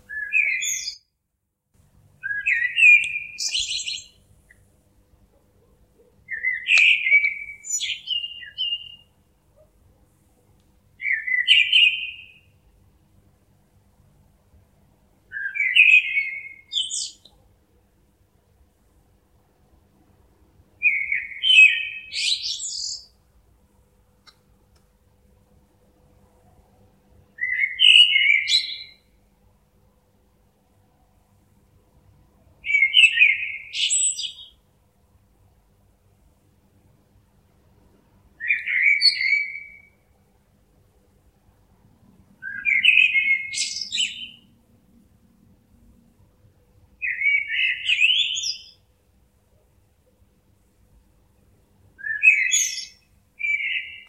A bird sing in the night
bird, birds, birdsong